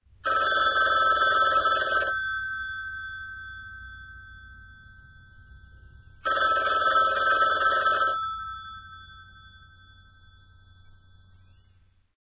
Bell System Ringer Model 687A 8 70 1
phone, ring